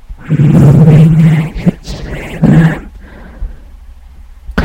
Last night I finished these but actually i did them months and months ago... Pills.... ahh those damn little tablet that we think make everything O.K. But really painkillers only temporarily seperate that part of our body that feels from our nervous system... Is that really what you want to think ? Ahh. . Puppy love..... Last night was so...
weird, processed, vocals, noisy, kaoss, musik